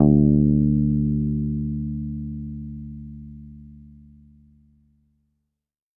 First octave note.
bass, electric, guitar, multisample